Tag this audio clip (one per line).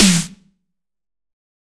DnB
drum-and-bass
dubstep
fl-studio
glitch
hard
heavy
Hip
hop
pitched
processed
punchy
skrillex
snare